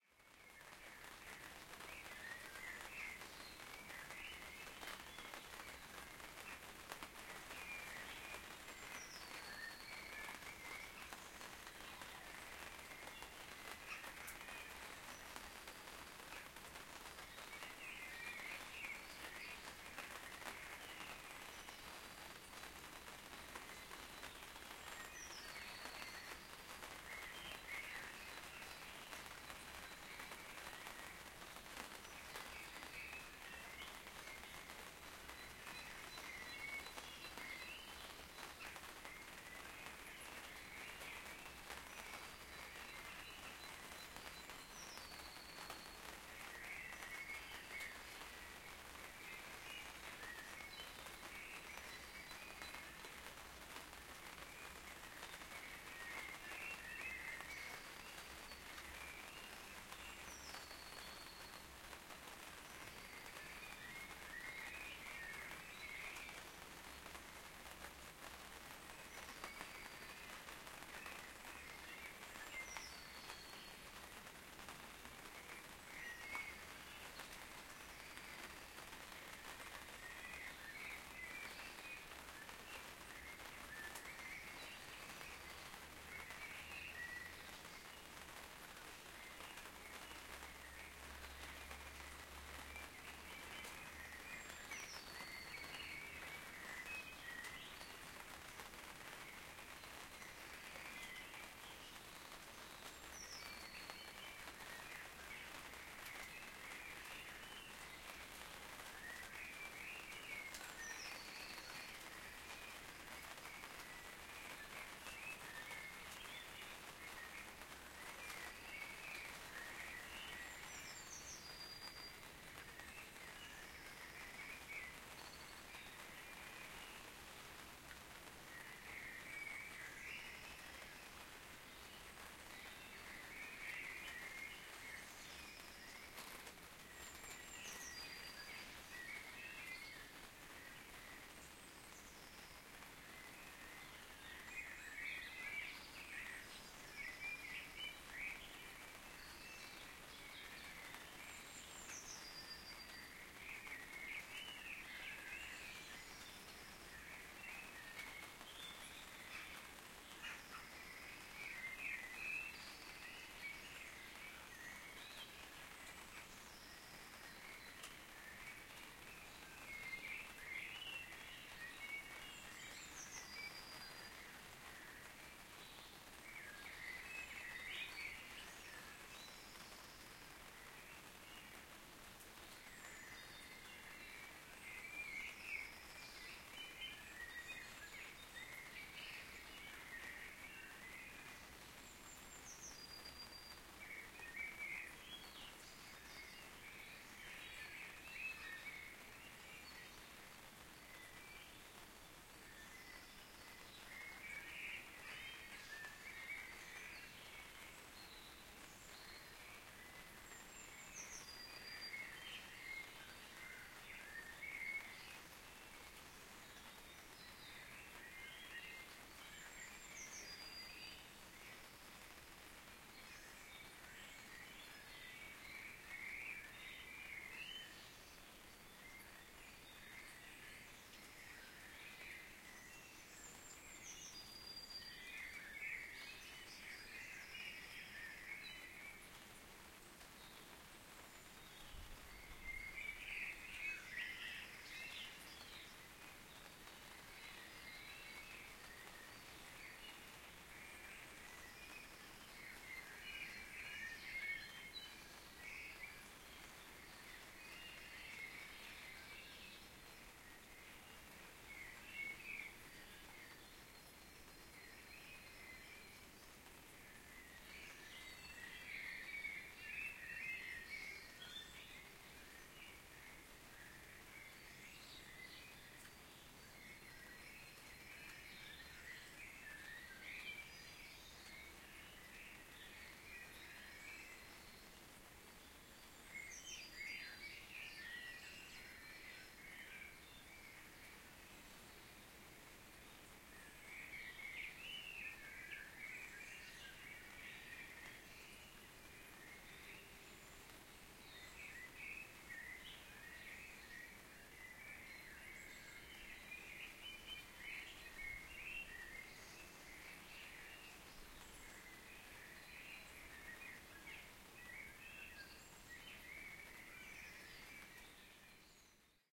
Soft Rain on a Tent & Bird Ambiance
Soft Rain Sound on a Tent & Bird Singing Ambiance